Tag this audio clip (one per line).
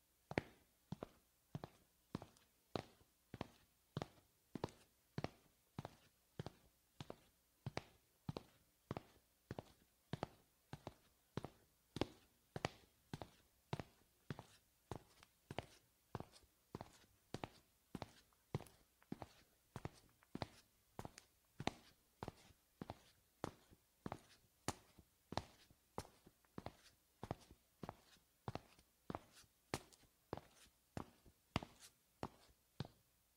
footsteps
kitchen
linoleum
male
shoe
shoes
slow
sneakers
tennis
tile
walk
walking